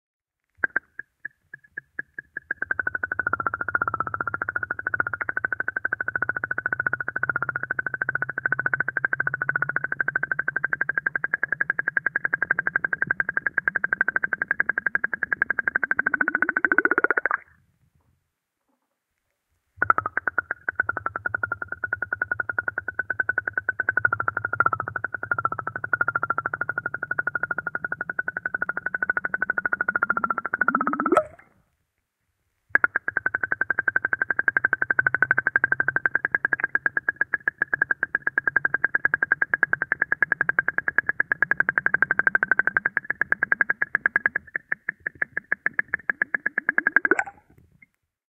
Bubbles 2mm
A hydrophone recording of air bubbles being blown through a Plastic tube underwater.Title denotes diameter of tube.This one was made with an old syringe without a needle. DIY Panasonic WM-61A hydrophones > FEL battery pre-amp > Zoom H2 line-in.
bubble, bubbling, water, submerged, hydrophone, bubbles, underwater